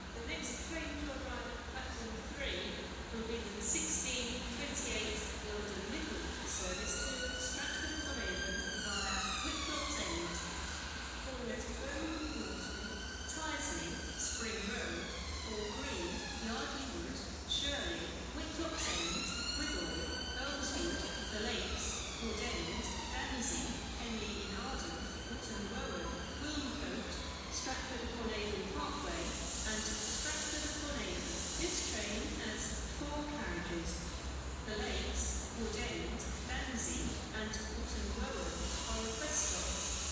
Platform Announcement and Siren
Sounds of a train platform, announcement, siren passing
Train, Voice